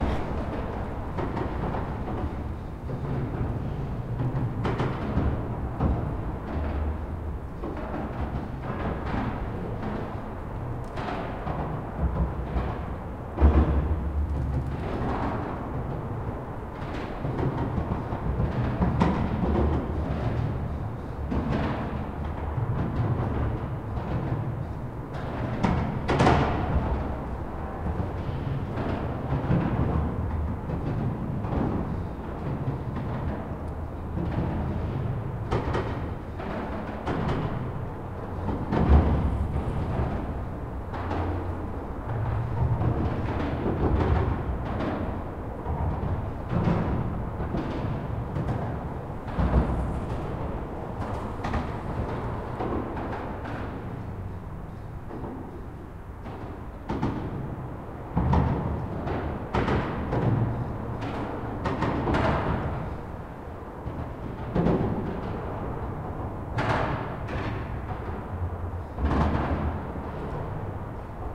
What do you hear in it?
under Leningradskiy bridge2
The roar of a bridge, when the cars drive over the bridge. Left river-side.
Recorded 2012-09-29 04:15 pm.